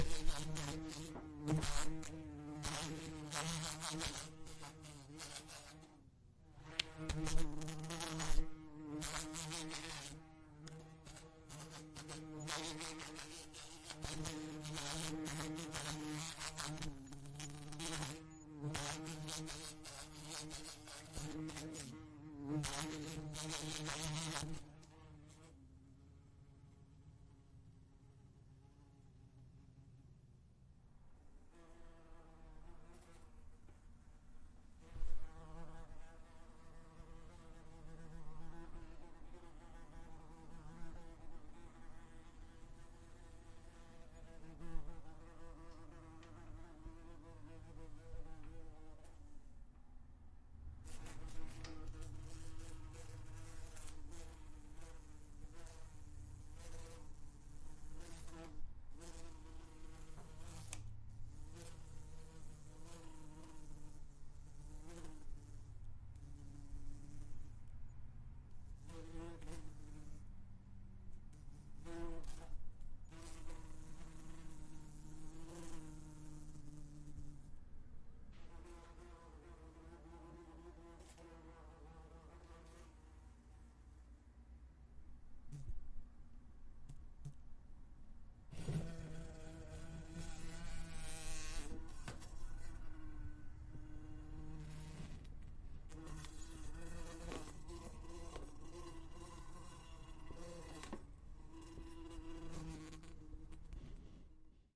0:00 - Right around the mic
0:25 - On the other side of the room
1:28 - Trapped in a cup